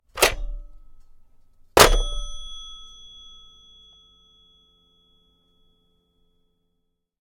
Rotary Phone Pick up and Slam down
Picking up, then slamming down on an old rotary phone.
Click here for the rest of my rotary phone samples.
Antique, Dial, hang-up, Mechanical, phone, rotary, Telephone